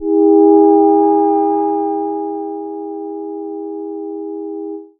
minimoog vibrating G-4
Short vibrating Minimoog pad
electronic, minimoog, short-pad, synth